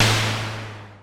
snare long3
I recorded these sounds with my Korg Monotribe. I found it can produce some seriously awesome percussion sounds, most cool of them being kick drums.
monotribe, percussion, analog, sn, snare, drum